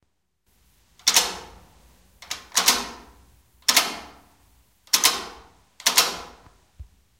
movimiento repetitivo del seguro del porton
llave, metal, mover